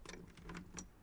door knob 1-2
turning door knob
knob, door